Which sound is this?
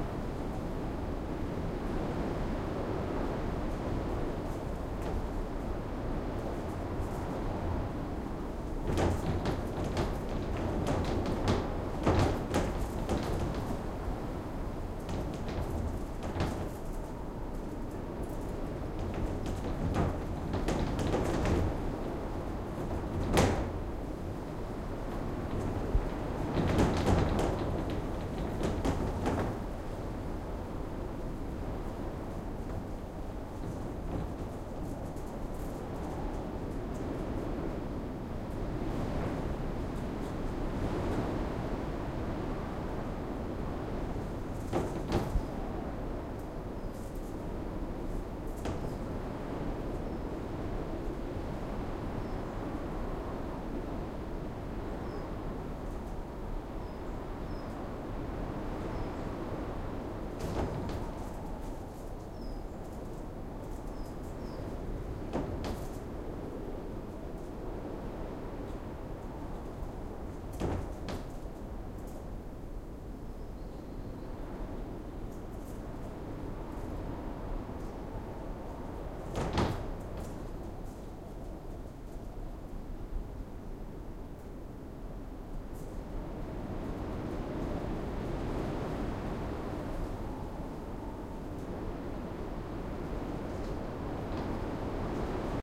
filsoe windy shed
I'm inside a shed that ornithologists use to hide in, while watching birds. It was pretty windy, and a couple of windows were rattling every now and then. A little spooky atmosphere.
Recorded with an olympus ls-100, internal mics.